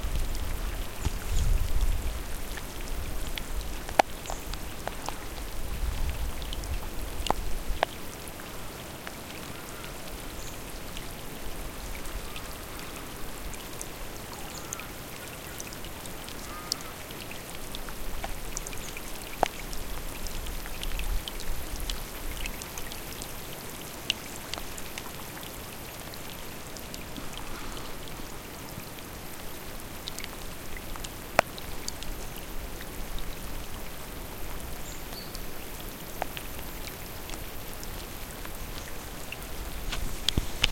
Rain pitter pattering onto the surface of Lake King William, TAS, Australia. Recorded on LS10